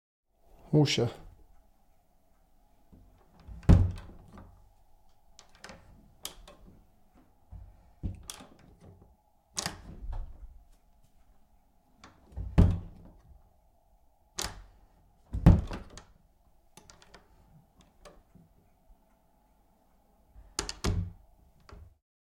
opening and closing a door.
door, interior-door, room-door